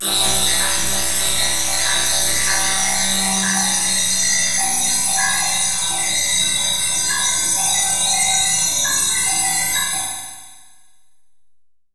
Granulated and comb filtered metallic hit
comb, grain, metal